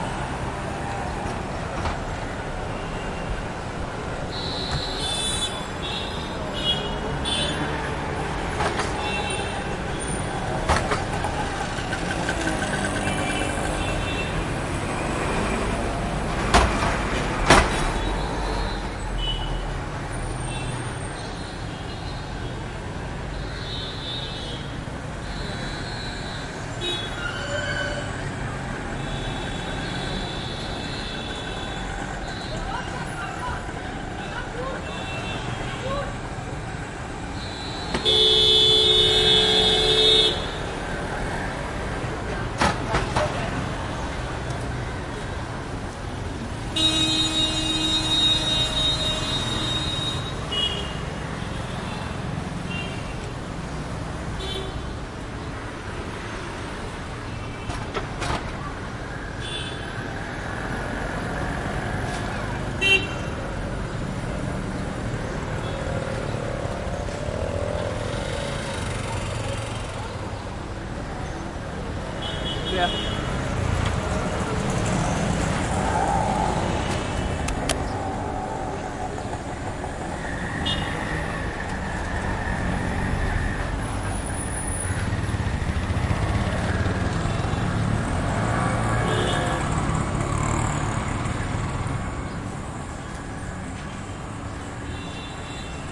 traffic medium throaty motorcycles rickshaws mopeds cars trucks spacious tone echo India
cars, India, medium, mopeds, motorcycles, rickshaws, throaty, traffic, trucks